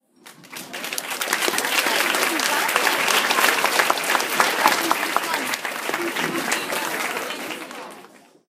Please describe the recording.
Large crowd applause sounds recorded with a 5th-gen iPod touch. Edited in Audacity.
applause, cheer, clap, clapping, crowd, people